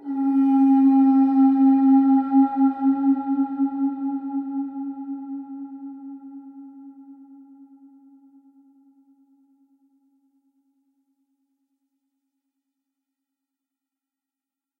conch scary 01
alpenhorn, clarion, conch, conch-shell, horn, mountain, pyrenees, swiss